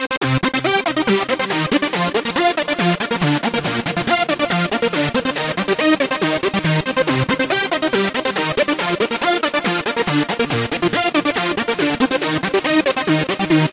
Synth sequence with bent note properties. This sample has been used by the band Abandon All Ships. I am the original author. Thankyou